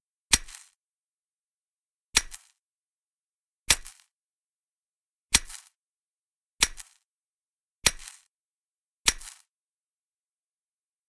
Just a simple vintage lighter sfx with sparkling details at the end.